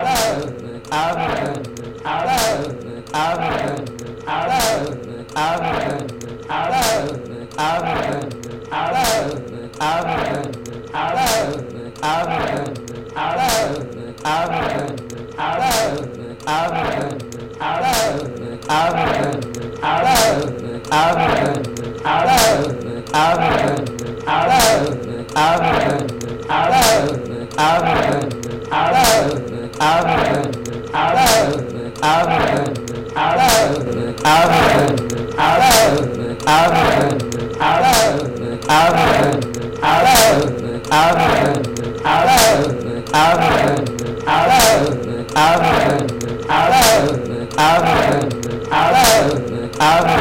hey listen pal, I really don't appreciate you coming here. I truly don't. If you dare cross me, that's fine.
afraid, spectre, impending, imaginair, atmos